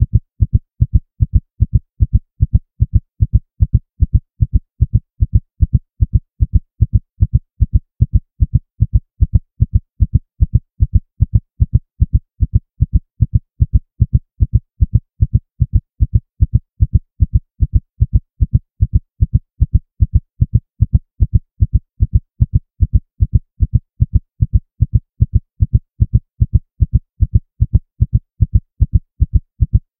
heartbeat-150bpm-limited
A synthesised heartbeat created using MATLAB. Limited using Ableton Live's in-built limiter with 7 dB of gain.
heartbeat, heart, body, synthesised